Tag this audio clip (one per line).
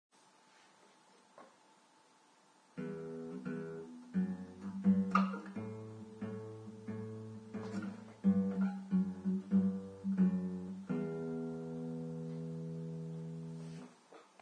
acoustic
guitar
practice